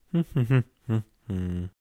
dialogue humming3
Humming for a game character
character, dialogue